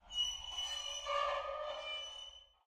as ab os metal squeek
Various hits and sqeeks, grabbed with contact mic
ambiance, sqeek, hit, deep, sci-fi